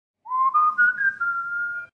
Whistle Project 2
I did some whistling and effected the speed, pitch, and filters in a few ways
whistle, f13, project, whistling, stairwell, fnd112, echo